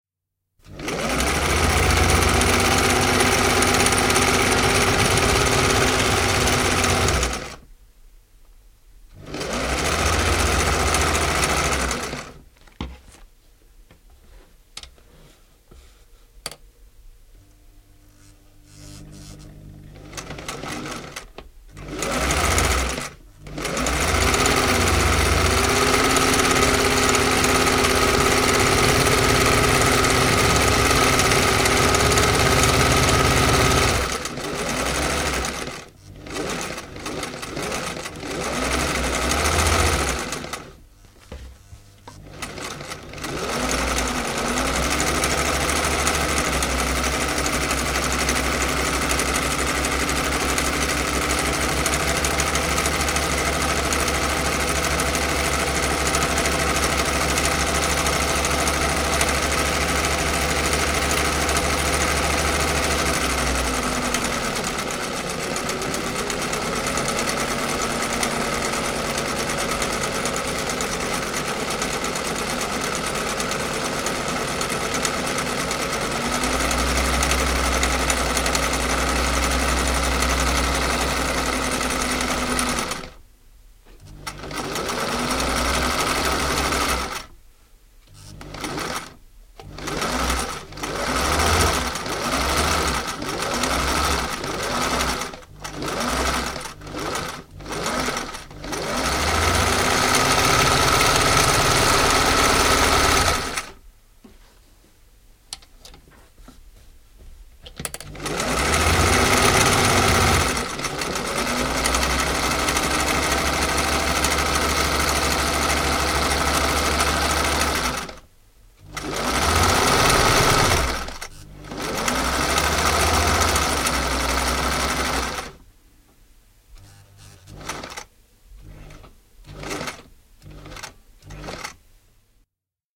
Ompelukone, sähkö / Sewing machine, electric, zig-zag stich (Singer 457)
Sähköompelukone, siksak-ommelta. (Singer 457).
Paikka/Place: Suomi / Finland / Espoo, Laajalahti
Aika/Date: 01.03.1970
Electric, Field-recording, Finland, Finnish-Broadcasting-Company, Ompelu, Ompelukone, Sewing, Sewing-machine, Siksak, Soundfx, Suomi, Tehosteet, Yle, Yleisradio, Zigzag